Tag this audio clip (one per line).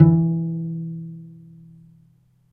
acoustic
multisample
pizzicato
pluck